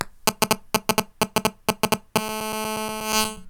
Cell Phone Interference Noise 1
Cell (mobile) phone interference noise through my speakers.
Recorded with Presonus Firebox & Samson C01.